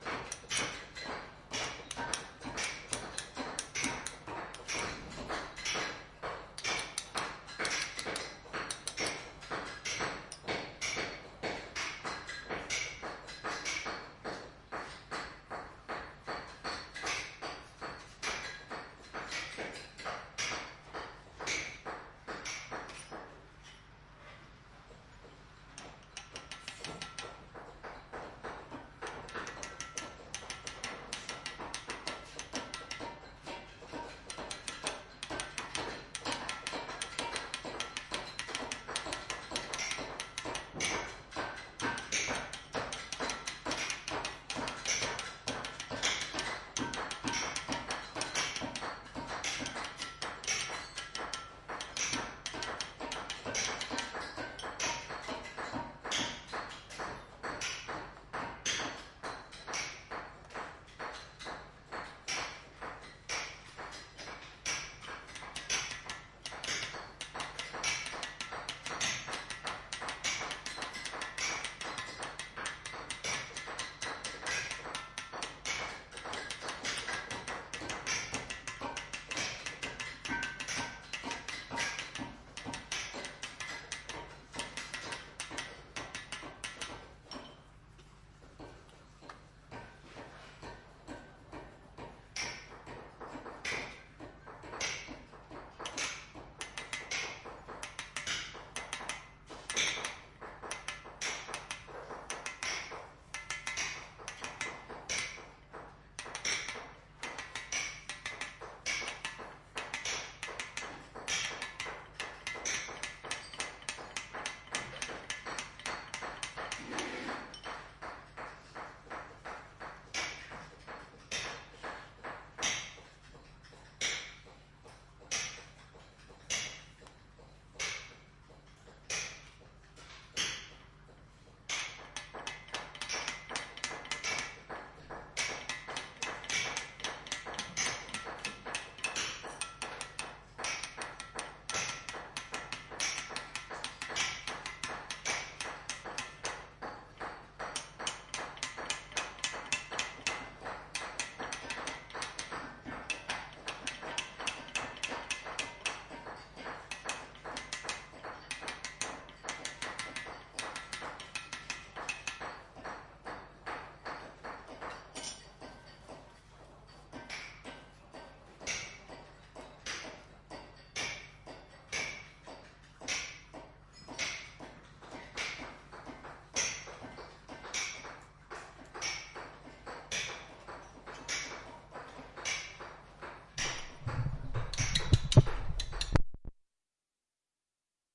Stone Mason Work Shop-001
York Minster stonemason workshop
carving, craft, stonemason, stonework, stone, masonry, workshop, tools